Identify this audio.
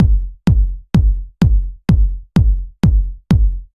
Rpeople 127 KICKLOOP 09
Kick Drum Loop
kick-drum, loop, sample